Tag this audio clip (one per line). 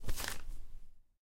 read
turn
book
magazine
reading
newspaper
flick
paper
page
flip